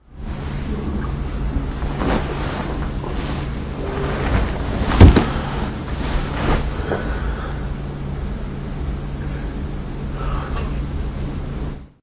Moving while I sleep. I didn't switch off my Olympus WS-100 so it was recorded.